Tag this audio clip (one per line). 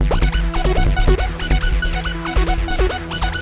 synth
techno
studio
melodie
loop
ffl